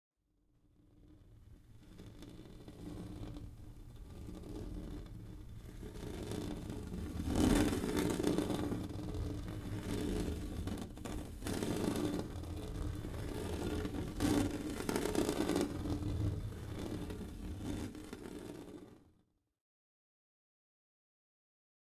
Scratching Window with Nails
Scratching a plexi window with my nails.
Zoom H4N Pro
2018